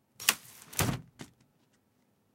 automatic umbrella opens
mechanics, opens, umbrella